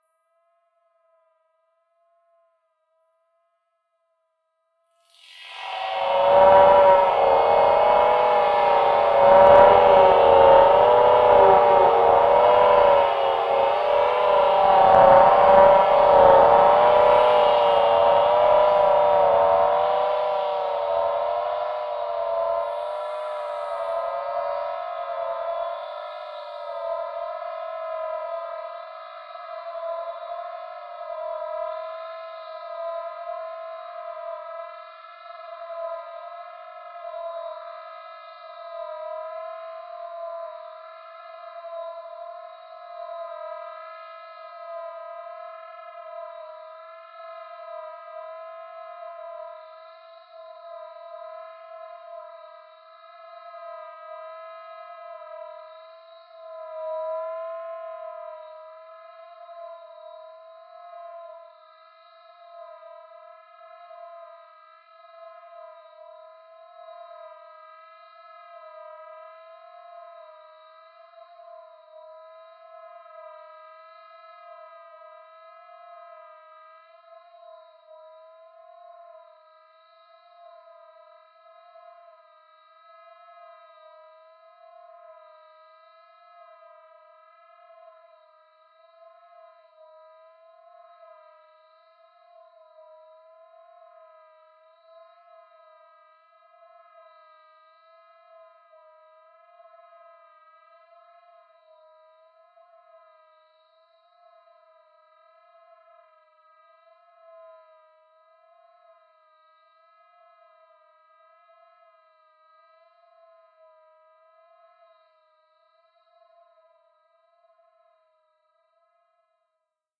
VIRAL FX 03 - C2 - SPACE SWEEPING FREQUENCIES with long delay fades
Slowly sweeping frequencies with a very slowly fading away delay. Created with RGC Z3TA+ VSTi within Cubase 5. The name of the key played on the keyboard is going from C1 till C6 and is in the name of the file.